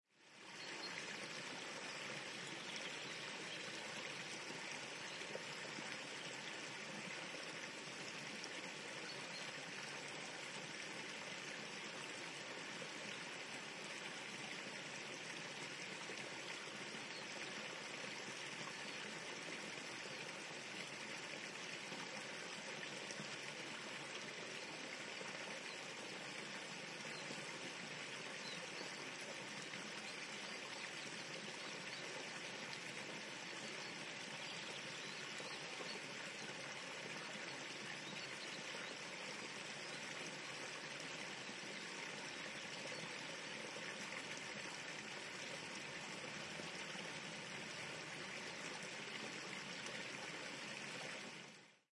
Water gently flowing in the brook.
brook, creek, flowing, liquid, relaxing, river, stream, water